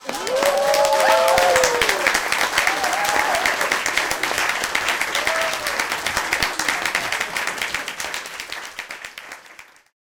Pleased Crowd
People cheering at a small (40 people) concert. It's two clap tracks layered on top of each other.
The location was Laika and the artist was Princessin Hans.
applaude, applauding, applaus, applause, cheer, clap, clapping, claps, concert, hands, party, people, yay